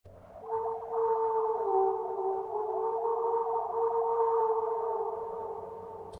Strange Echo Voice
Strange echo sound from far aways.Created with blue yeti pro and voice changer.
breath, creature, dramatic, echo, effects, entertainment, film, filmmaking, futuristic, game, intro, scary, sound, talk, talking